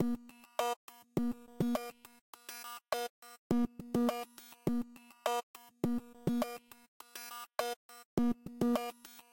Glitchy StepsDisturbet 102bpm
ABleton Live Synthesis
glitch, loop, drum